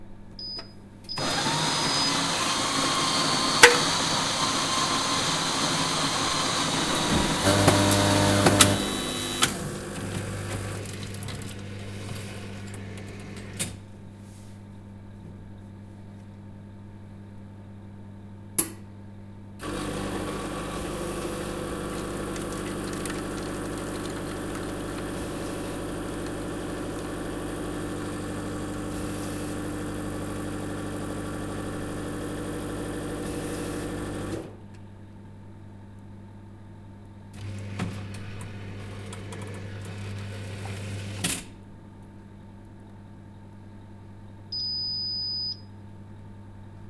Sound of a vending coffee machine from the start to the end. Includes grinding of fresh coffee beans, the drop of the plastic cup, the fill and the beep at the end.
Recorded with a Zoom H4n indoor in a small lounge in January 2014. No further sound editing.